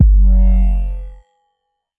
moody layered ring mod bass